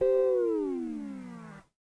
Tape Slide Guitar 8
Lo-fi tape samples at your disposal.